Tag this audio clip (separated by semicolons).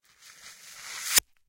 metal; clang; cycle; steel; rattle; metallic; piezo; frottement